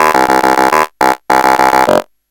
Some selfmade synth acid loops from the AN1-X Synthesizer of Yamaha. I used FM synthese for the creation of the loops.
acid, sequence, yamaha